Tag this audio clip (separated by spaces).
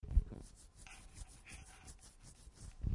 Head Rascar Scratch